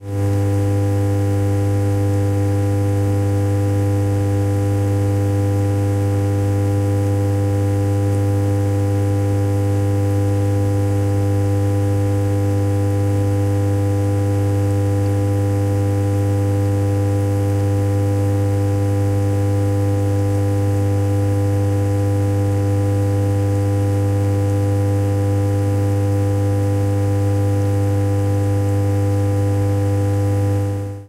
Drone, Dishwasher, A
Raw audio of a dishwasher droning while active. Looking at this through a spectrogram reveals a huge amount of harmonics and partials based around a fundamental of 100Hz, which is why this sound is so alluring to hum along with. I highly recommend listening with headphones and trying to hum at a perfect 5th above the low drone - the "fundamental" - and feel your voice resonating with this universal principal of sound - the harmonic series.
An example of how you might credit is by putting this in the description/credits:
The sound was recorded using a "Zoom H6 (XY) recorder" on 10th May 2018.